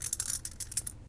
Keys being shaken and scraped together.
metal, metal-on-metal, office, shake